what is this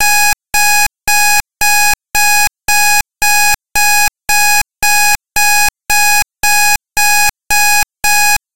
Alarm Clock Loop
A looped digital synth done in LMMS to resemble a alarm clock.